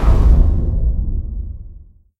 Explosion sounds make with Audacity with white noise and other types of noise.
boom, detonation